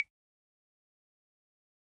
percussion sound in Dminor scale,...
itz my first try to contribute, hope itz alright :)
percussion, africa, phone, instrument